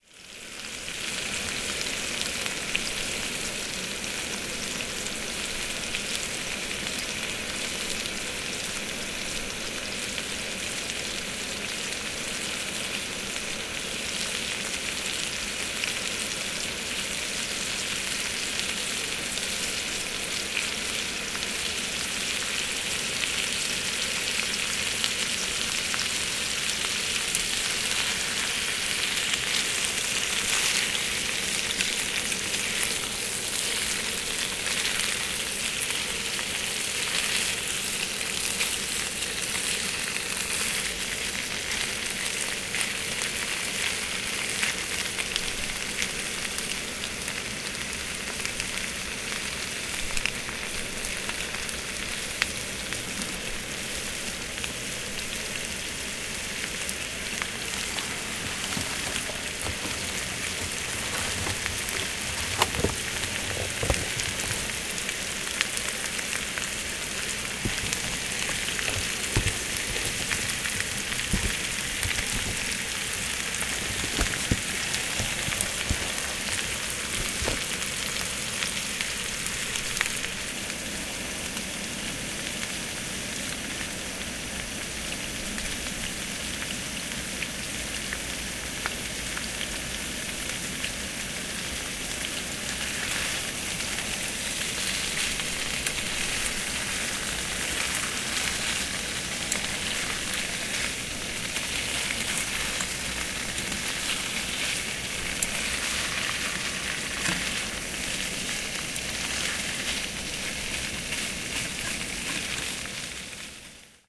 110813-frying potatos
13.08.2011: fourteenth day of ethnographic research about truck drivers culture. Oure in Denmark. In front of fruit-processing plant. Frying potatos outside the truck. Sound of sizzling.
camping-stove, swoosh, sizzle, field-recording